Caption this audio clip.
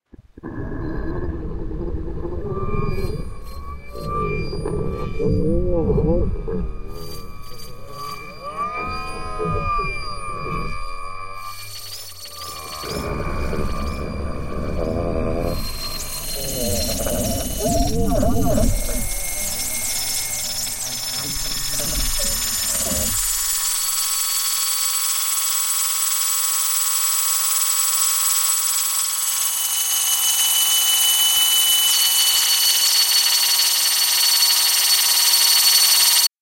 This is weird spooky alien stuff, made with my voice, audio edited and some weird midi stuff.